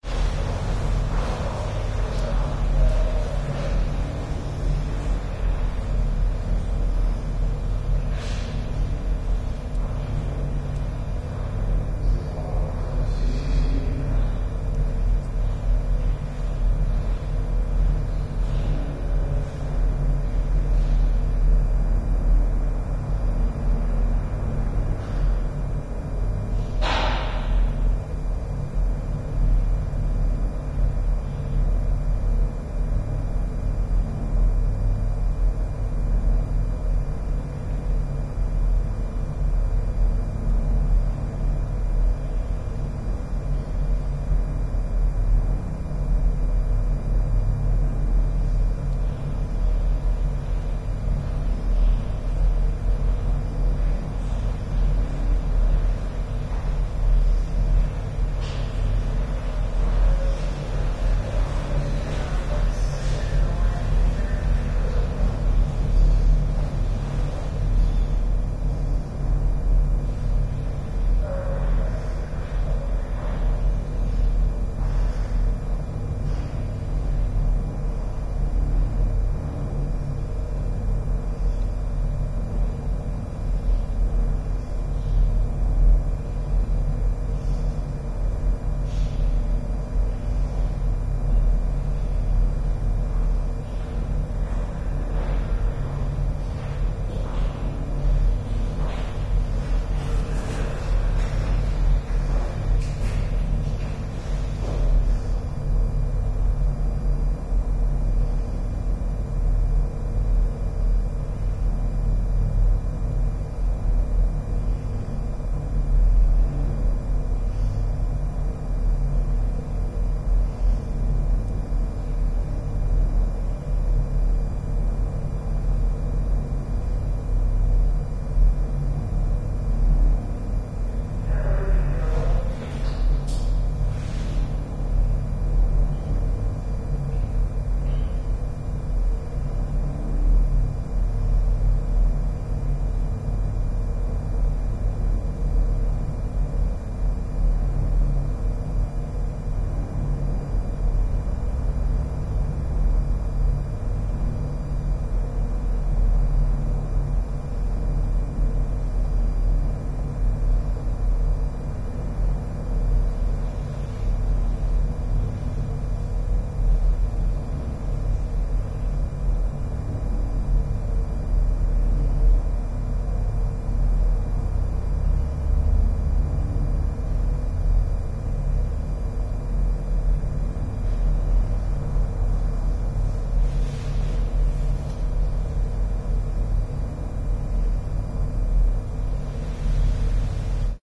Police Station Atmosphere 1 - Very distant rt voices - aircon - traffic.
conditioner, Atmos, office, tone, Room, Interior, station, cell, Air, Open, Police, City, Buzz, ambience, cars